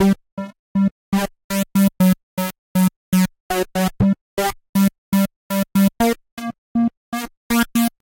MS Gate low
Synthie loop constructed with Korg MS 2000
2000, key, keyboard, korg, loop, ms, sample, sequence, synth, synthie